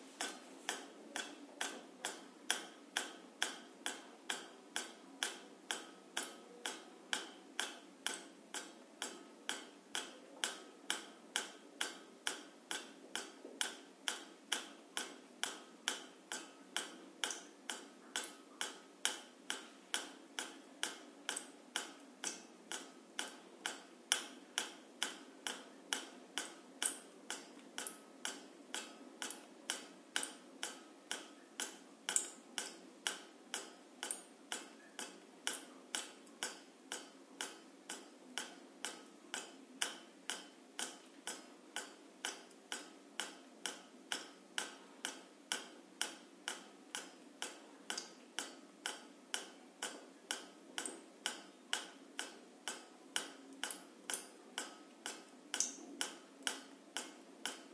20110924 dripping.stereo.07
dripping sound. AT BP4025, Shure FP24 preamp, PCM M10 recorder